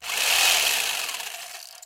Jigsaw Rev 01
electric, saw, industrial, wood, tool, jigsaw, jig, machine, rev, motor, power, working